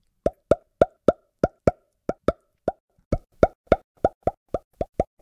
This is not meant as a loop. Recorded on MiniDisk with dynamic Ramsa Mic. No Reverb.